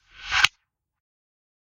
A third version of the turn off sound effect I uploaded.